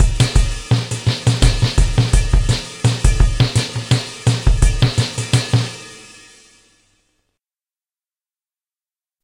75bpm Slow Motion Sickness 03
weird loop thing
drums, slow